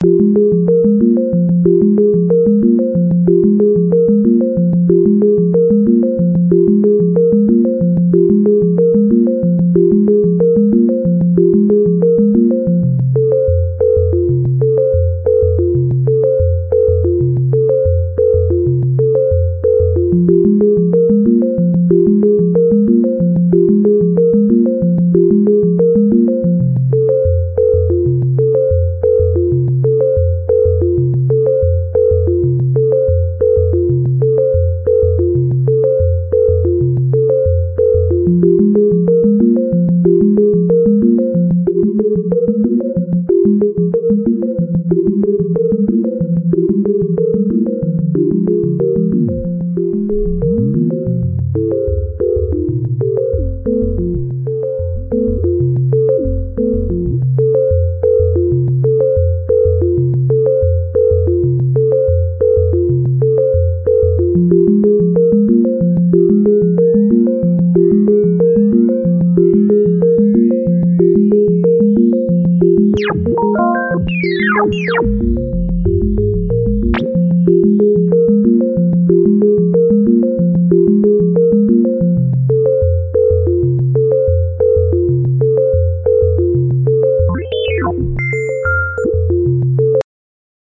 fm stuff for film waiting around
music made for pondering. filler music between scenes maybe. could be used in a video game also when making a purchase or visiting a market
acting, digitone, film, hills, market, movie, ponder, question, science, scifi, shop, think, waiting, zelda